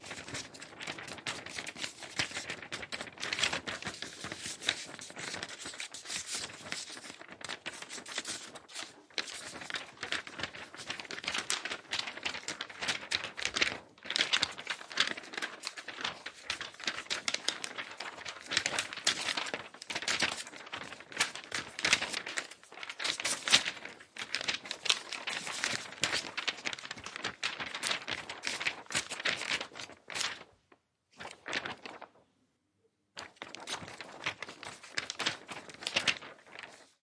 PAPRFltr -gs-
Paper flying or flutter sound effects.
Recorded on t.bone EM-700 stereo pair microphones into Zoom H4n Pro Black.